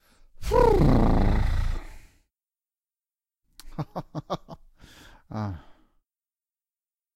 AS076583 Relief
voice of user AS076583
consolation, vocal, human, cheer, man, voice